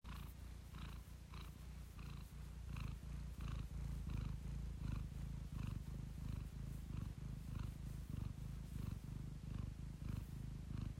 Cat Purring
This audio contains a cat that's asleep and busy purring. This sound can be used for animations or live action films.